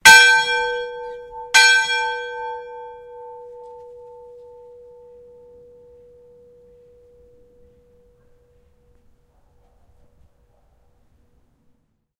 McCathran Hall Bell
This is a field recording of a large cast iron Town Bell that would ring to announce to a group of citizens important events.
Bell, cast, Field-recording, iron